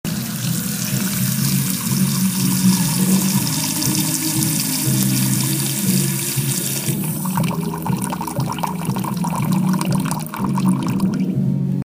The sound of running water from a tap.